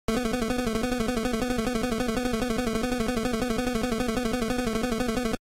A sound created in Famitracker centered around the note A# of the 2nd octave that could be used during text scrolling.